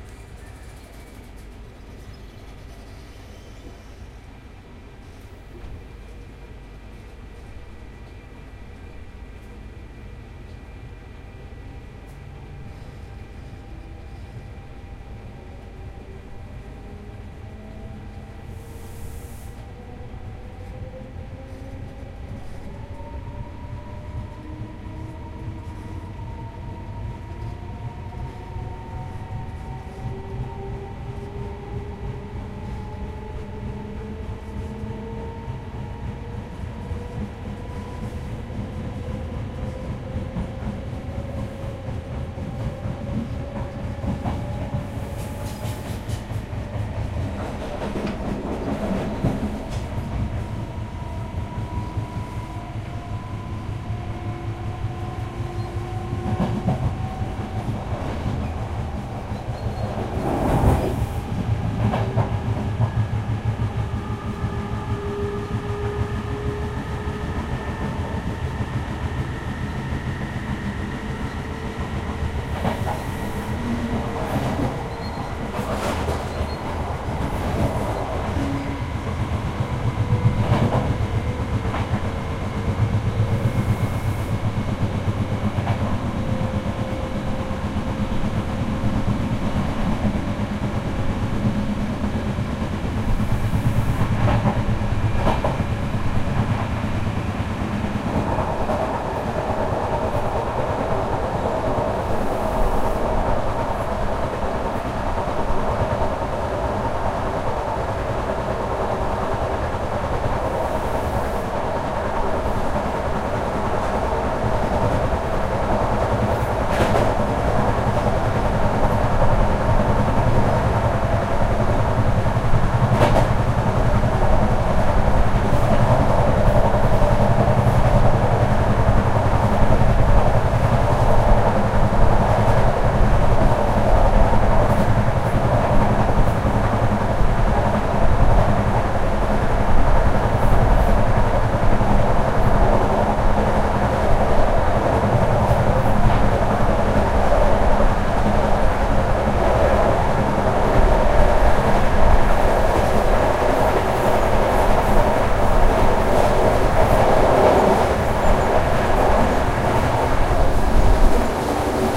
recorded inside a train in Italy with a korg H4n
field, recording, train